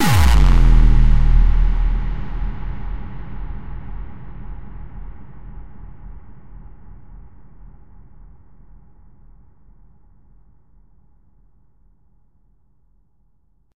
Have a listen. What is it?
Hardcore boom 3
A deep classic hardcore with a noise head produced with Sonic Charge's MicroTonic VST on a bed of reverb. Dark and evil with much bass in it!
boom, big, reverb, hardcore